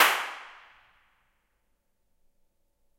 Clap at Two Church 7
Clapping in echoey spots to map the reverb. This means you can use it make your own convolution reverbs
room, spaces, reflections, impulse-response